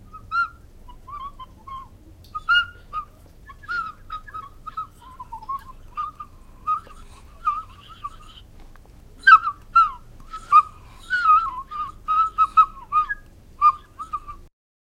Bird sound made with a flute.